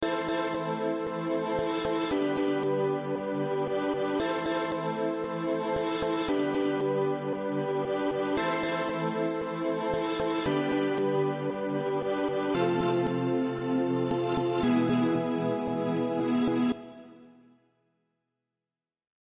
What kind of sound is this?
Kyoto Chords, Synth Pattern
A synth pattern I recorded and edited using Logic Pro X. Full and choppy, good for a standalone synth line.
Chords- Am, G, Am, G, Am, G, F, E. BPM 115